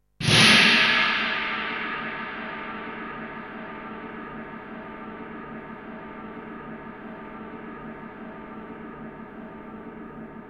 industrial cymbal01
cymbal, industrial